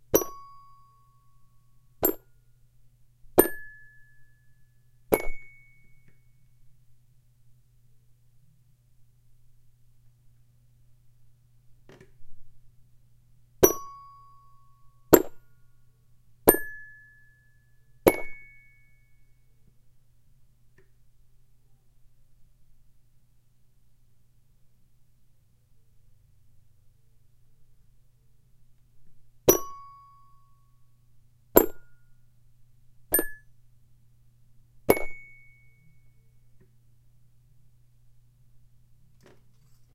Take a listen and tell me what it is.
Raw take of unedited hits of a toy plastic piano recorded with a clip on condenser and an overhead B1 at various pan pot positions on the mixer.
piano, instrument, toy, multisample